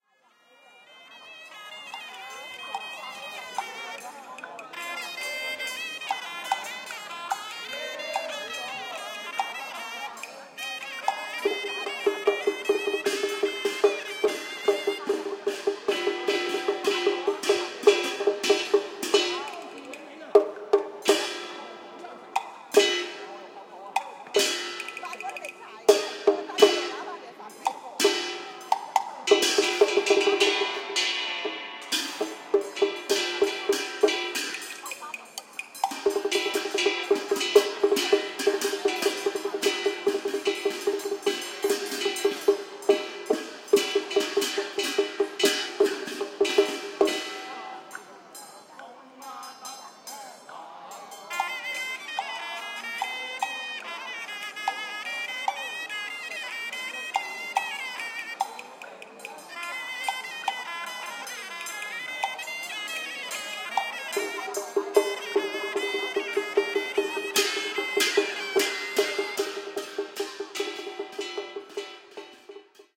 Chinese Traditional Ritual 1
Stereo recording of some ritual music using chinese musical instruments. A traditional Chinese ritual had been performed in the public open area of a housing estate. Chinese musical instruments and human voices were used. They prayed to a Monkey God for keeping them safe. Elderly were chatting and watching the ritual. Recorded on an iPod Touch 2nd generation using Retro Recorder with Alesis ProTrack.
traditional, hong-kong, ritual, chinese